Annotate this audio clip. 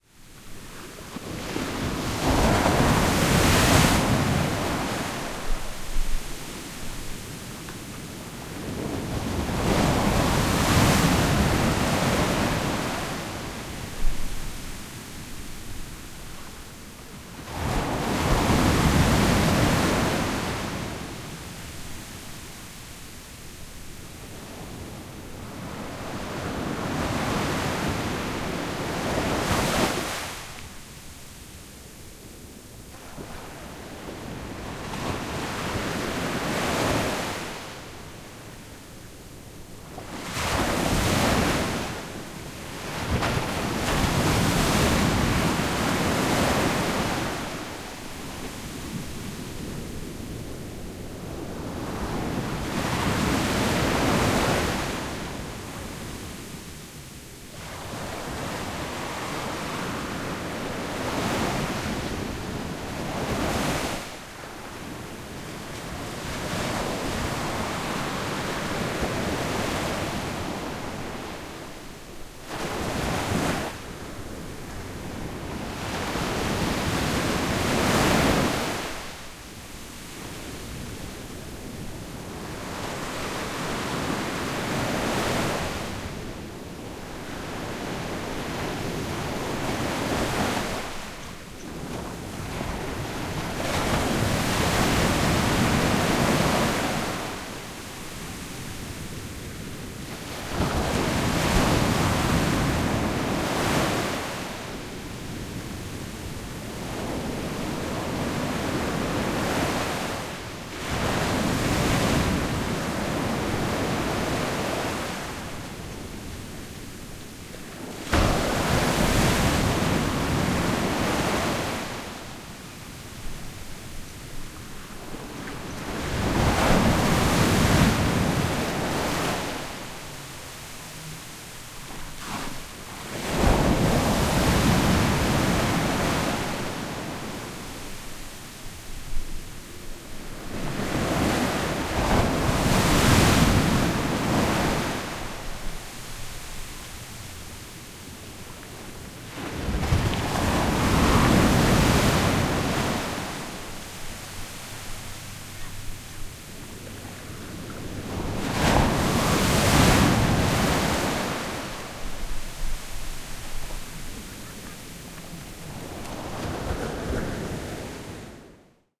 Waves crashing close-up with sea foam
Close-up recording of waves crashing, foaming, settling - very detailed recording with a nice wide stereo image.
spray, beach